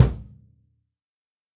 Toms and kicks recorded in stereo from a variety of kits.